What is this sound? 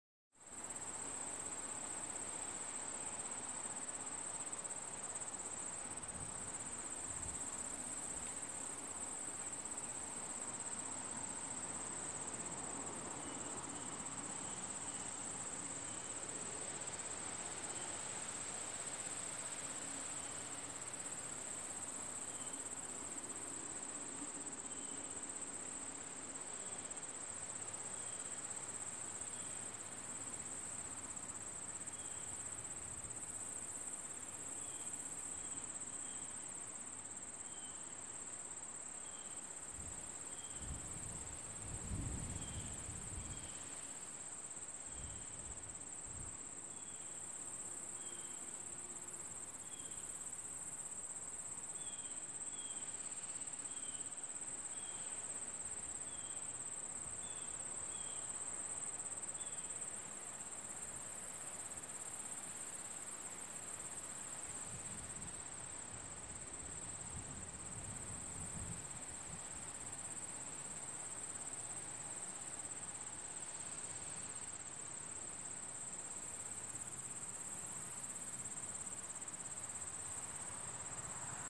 Sounds of summer aa
sounds of summer
ambient, sounds, outside, summer